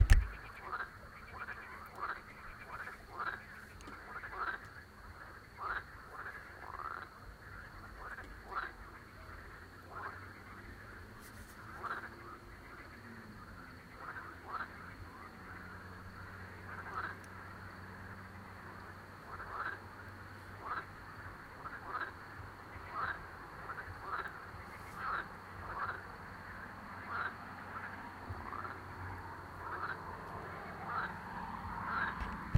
Babble of Frogs 002
Lots of frogs making noise in a french lake. Some insects and cars can be heard in the background at times.